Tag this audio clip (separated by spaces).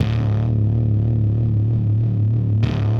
dist lofi guitar grimey loop hiphop drillnbass basslines free